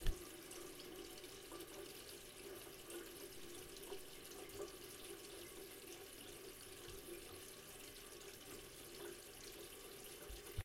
Running Tap 002
Running a tap in a small bathroom.
Water, bathroom, sink, short, tap, running